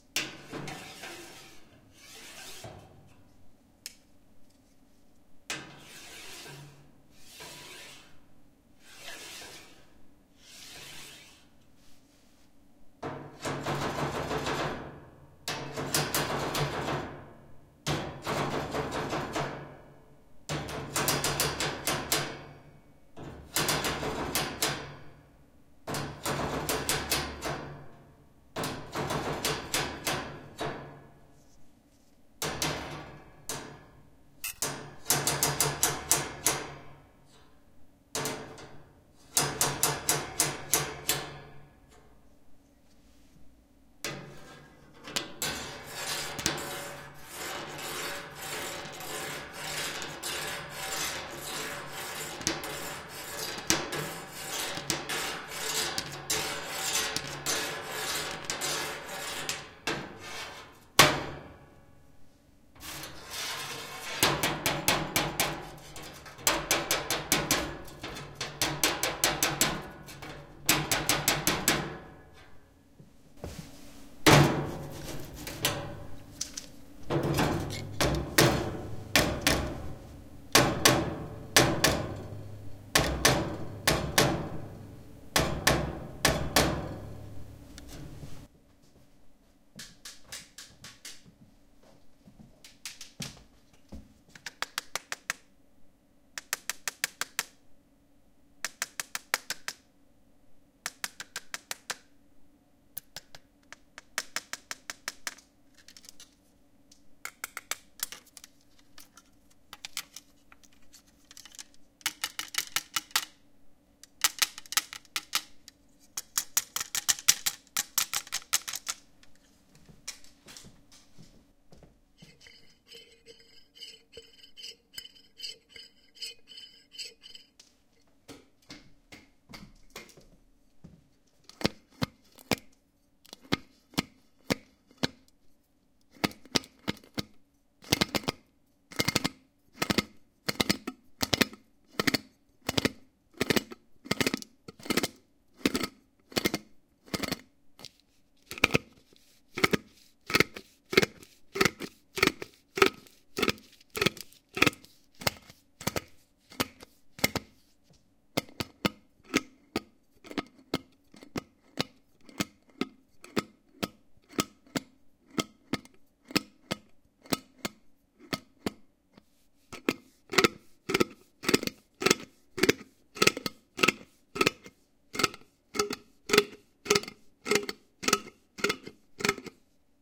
Metal and Glass Foley
What happens when you put me in a room with a metal radiator, metal ruler, a glass jar with a metal lid and a Zoom H2.
Edited with Audacity.
shake, heater, vibrating, ruler, vibrate, shaker, rubbing